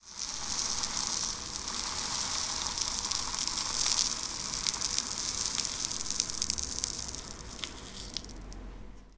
Flowing sand being poured from one container into another.